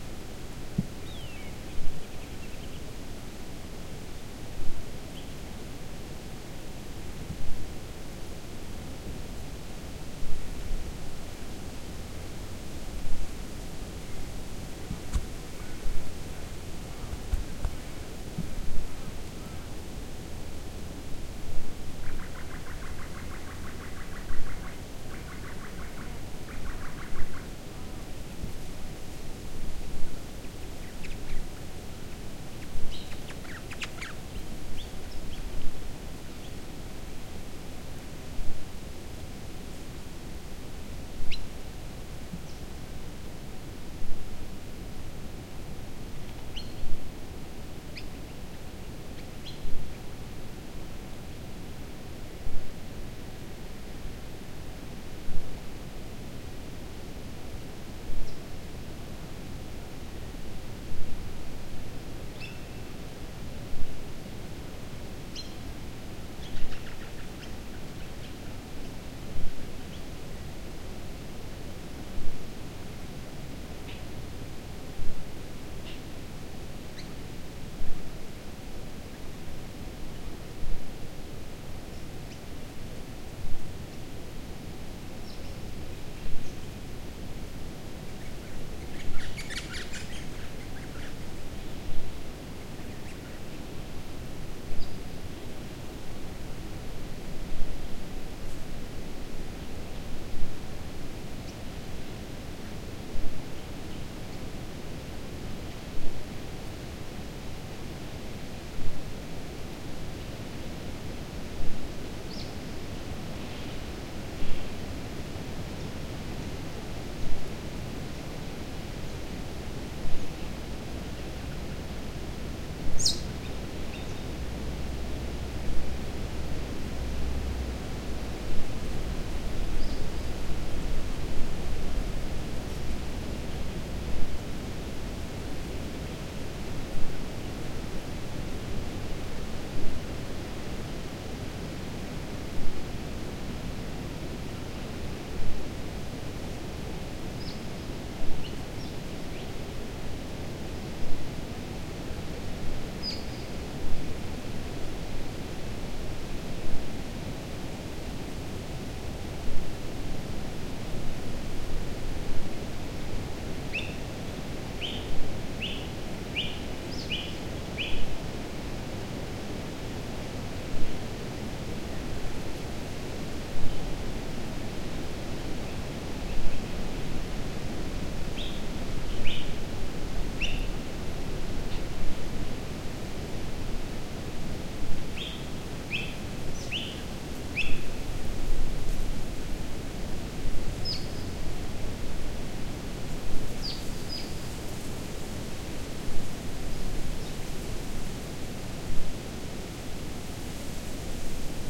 Jacinta Cain Atmos
Recorded by the river near Tyntynder Homestead in Victoria, Australia.
Recorded with the Zoom H4 with a Rycote wind sock.
Murray-river, australian, wind, atmosphere, Tyntynder, river, field-recording, insects, tyntynder-homestead, nature, atmos, homestead, birds, ambience, Australia, Murray